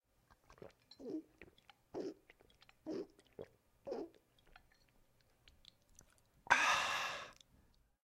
Drinking water loudly, and saying Ahh!
drink, ahh, gargle